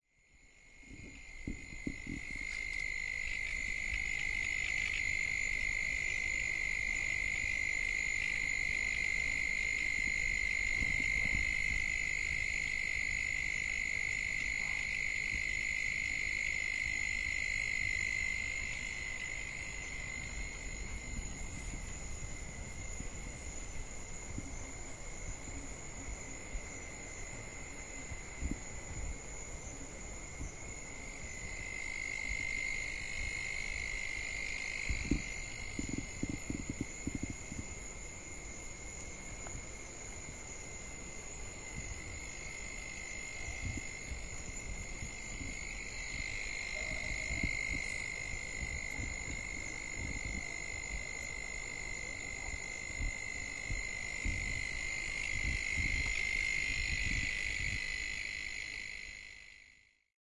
FR.PB.NightAmbience.5
NightAmbience at PraiaBranca, Brazil. Several kinds of insects making their EXTREME electronic-like performance.
ambience, ecm907, field-recording, insects, mzr50, nature, night, outdoors, sound, summer